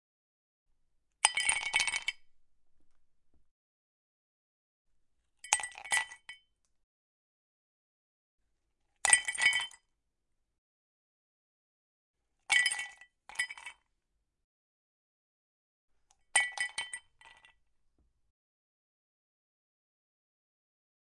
Ice Dropped Into Glass
Ice dropped into a rocks glass.